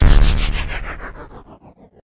A retro video game explosion sfx.